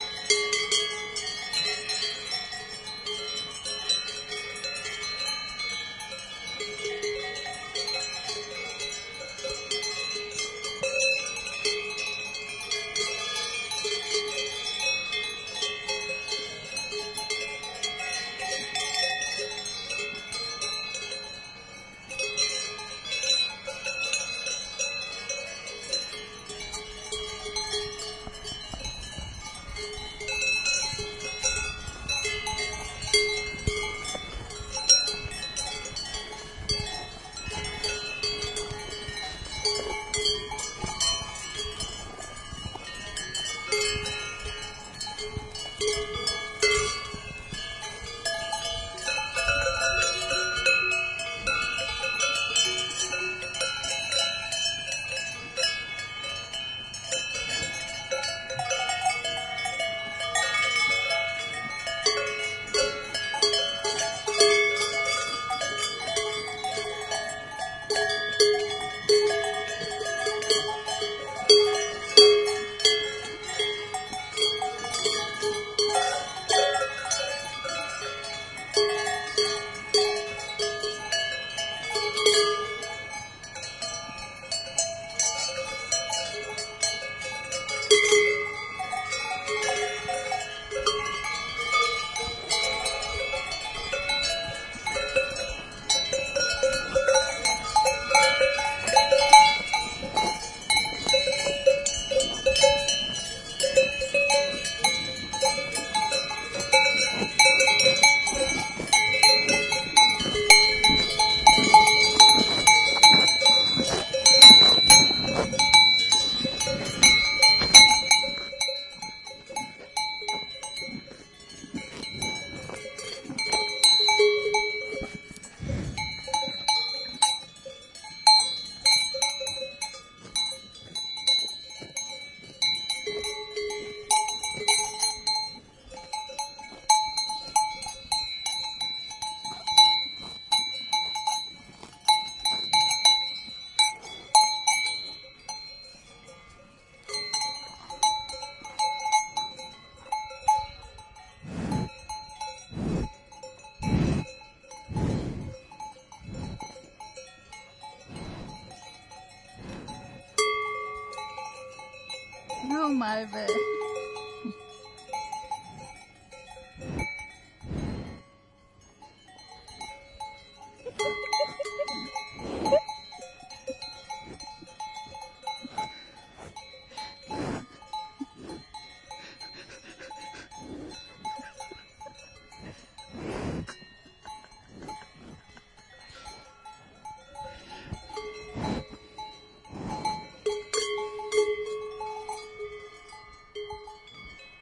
alm glocken1
herd of cows in the swiss alps going back to the meadow after being milked in the evening, recorded with tascam dr100